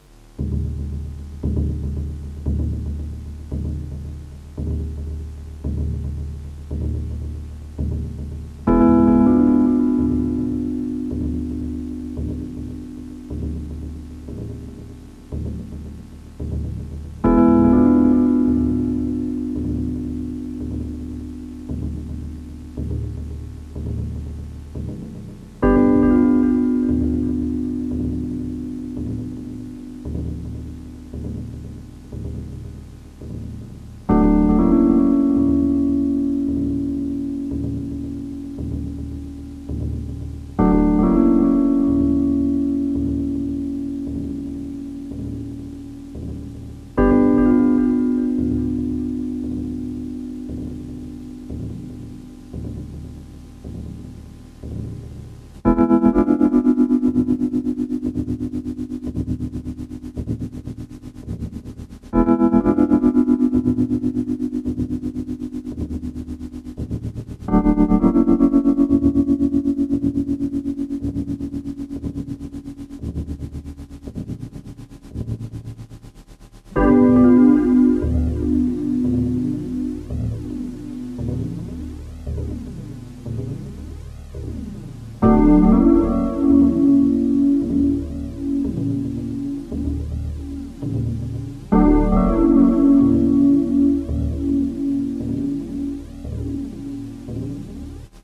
I have some books aboutlost civilizations. North American natives, called indians by stupidwhite men, were badly treated by immigrants from Europe. Thousands of natives were killed by the white intruders, who meant they had found new land. The fact that there were 1,000,000 'indians' already living there was